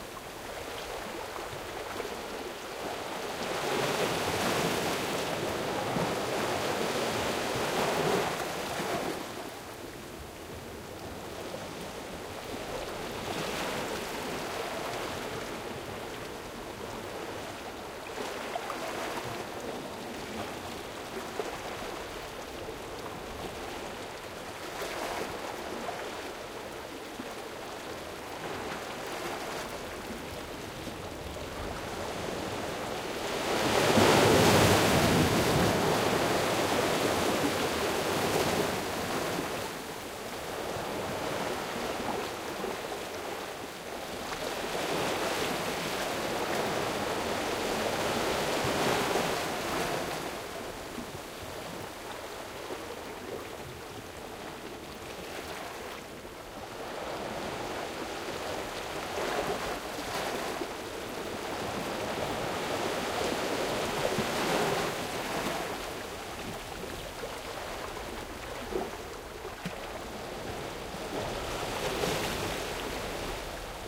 ambient Norway Nyksund shore waves hitting rocks close perspective stereo ORTF 8040
This ambient sound effect was recorded with high quality sound equipment and comes from a sound library called Harbours Of Norway which is pack of 25 audio files with a total length of 167 minutes.
ambient, harbour, myre, ocean, shore, waves